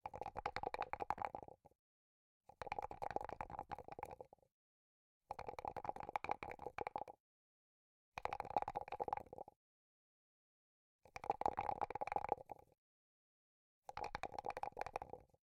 Dice (1) shake in cup
The name describes what it is: eg. Dice (3) in cup on table = Three dice are put in a cup which stands on a table.
The sounds were all recorded by me and were to be used in a video game, but I don't think they were ever used, so here they are. Take them! Use them!
dice, die, foley, game, yatzy